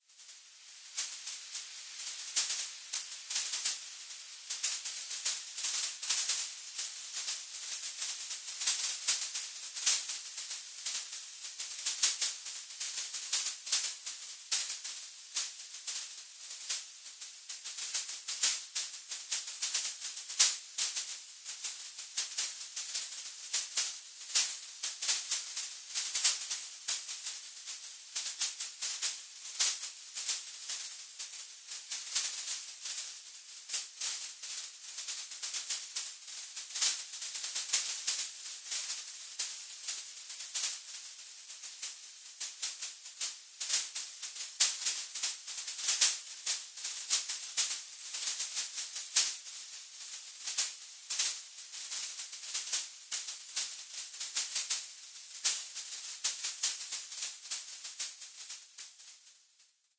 This sample is part of the "Space Drone 3" sample pack. 1minute of pure ambient space drone. Soft noise burst in an empty noise bath.